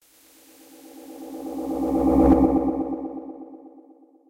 generated using a speech synthesis program. reversed and added echo to the previous echo.